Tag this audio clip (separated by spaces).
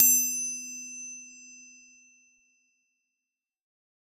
notification ting acoustic metal Idiophone indication instrument hit clang idea cue bell interface ping metallic Triangle user ring percussion magic ding ui